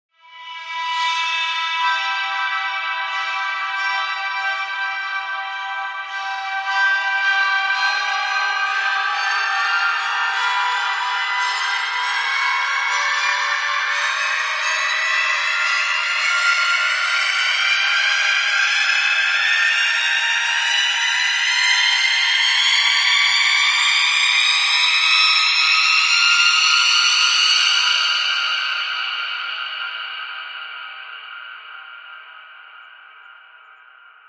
R-riser max
effect, sound-effect, riser, soundeffect, FX